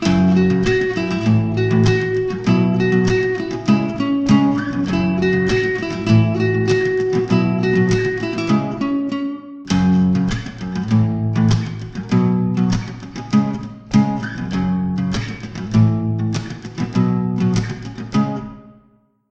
I created this loop with my guitar, 2 tracks with Reverb effect in Audacity
Take Care,